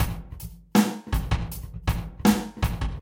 Simple 80bpm drum loop with room reverb.
loop, room, beat, drums, drum